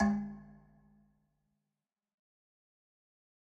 Metal Timbale 013
drum, god, home, kit, pack, record, timbale, trash